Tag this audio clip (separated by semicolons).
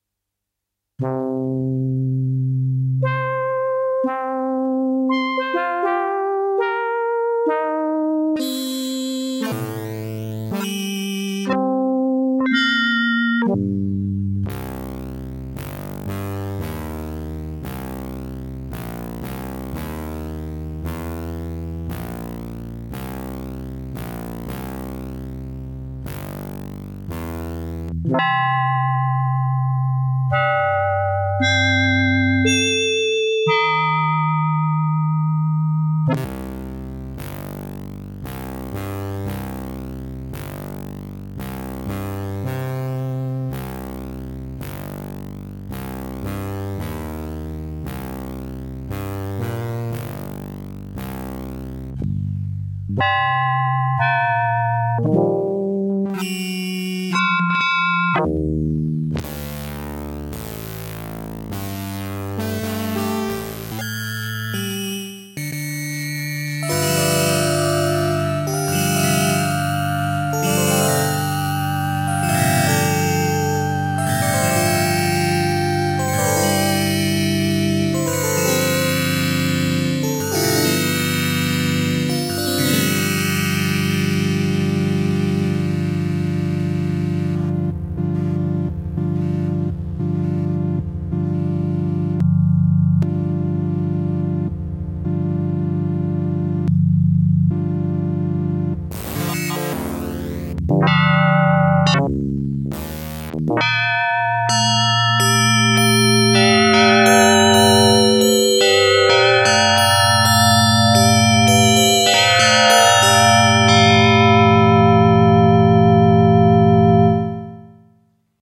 Keyboard; PSS-370; Yamaha